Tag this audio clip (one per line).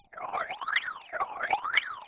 MUS153
GARCIA
SIREN